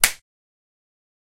Menu sound 2
Sounds for a game menu.